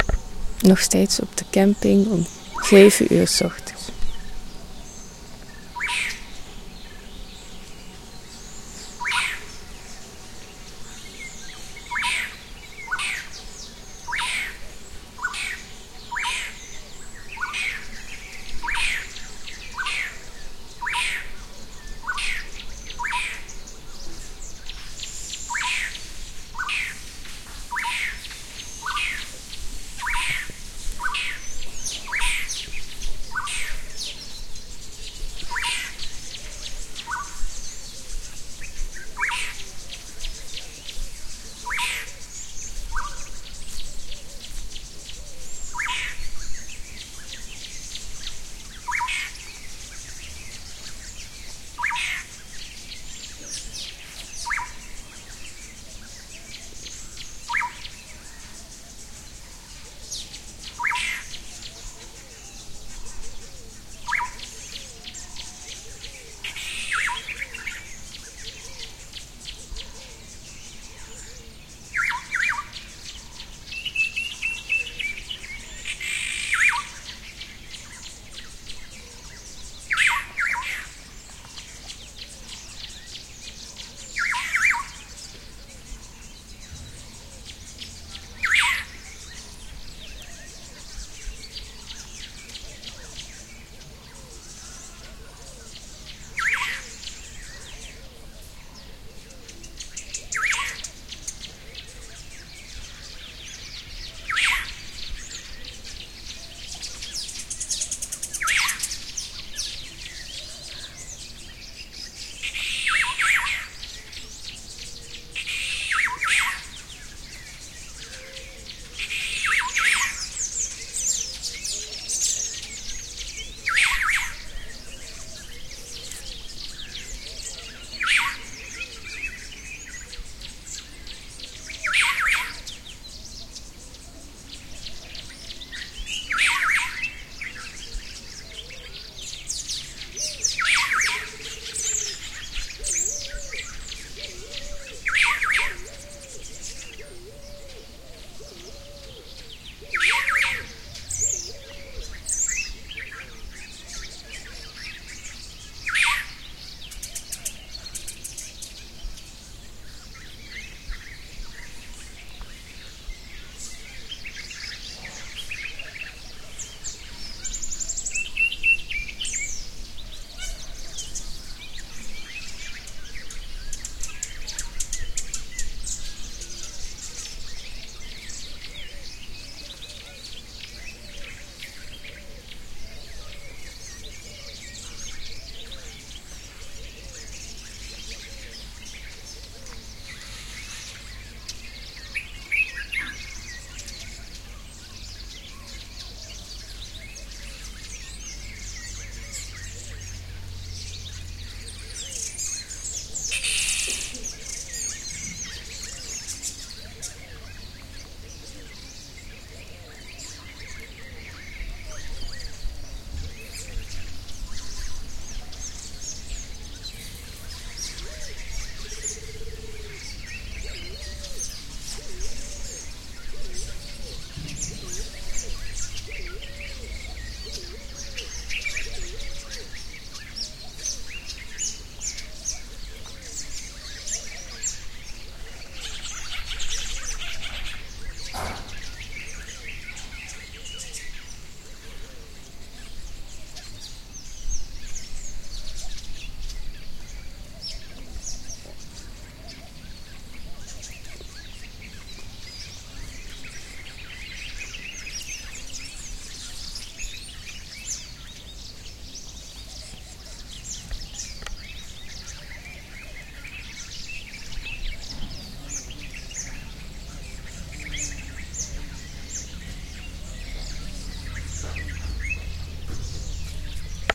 In nature park Mwere, Uganda, early morning.